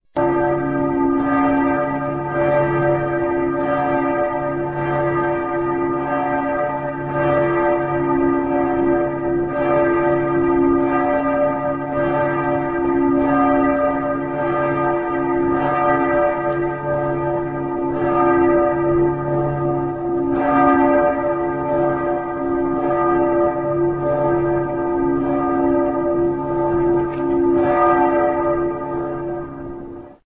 josephs und Marien glocke
this is a Kölner dom bell :joseph und marien glocken.videotaped and edited to make it audio(record it the video myself with a blackberry phone!)
bell, kolner, glocken